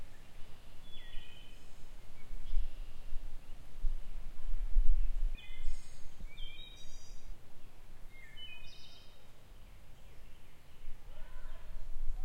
Philadelphia suburb bird songs
Recorded outside of Philadelphia, PA, USA, in May 2020.
birdsong, bird, spring, nature, birds, ambient, field-recording, forest